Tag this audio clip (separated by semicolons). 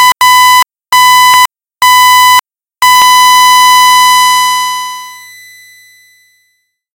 audacity; computer; death; doctor; flatline; game; half; heart; hl2; hospital; life; medical; monitor; mono; rate; surgery; tone